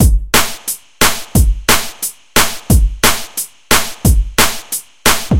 DnB Drums
178BPM DnB Beat.
Bass, DnB, Drum, Jump, Jump-up, up